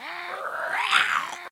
recording of creatures groaning